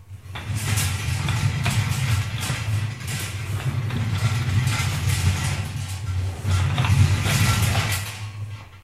recording of a shopping cart/wagon (?)
cart, field-recording, metal